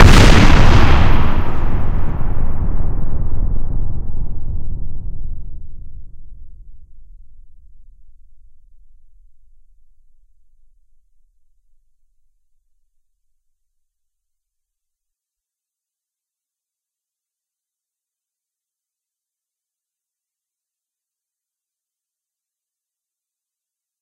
A big "you are there" deafening impact explosion, like a huge rocket hitting the ground and exploding underneath your feet. I used samples from sandyrb, nthompson, Noisecollector, and Jobro. Thanks guys!
EDIT: You gotta have good speakers to get the full 'impact' of this :D